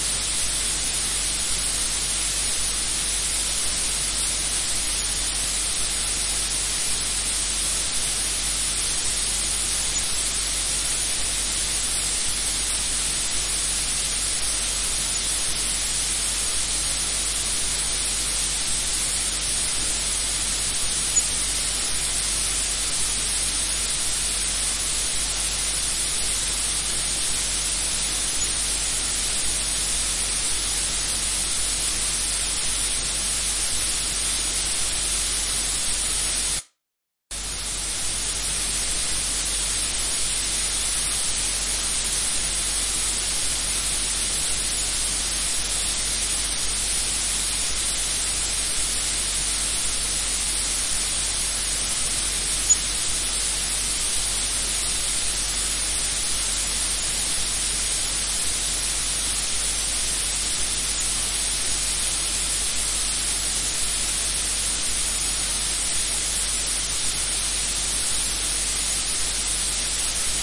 static noise2
This is the same static noise clip, but normalized to 0 dB with Audacity.
Turn the volume down, when listening this one. This is loud!
radio, noise, hiss, Static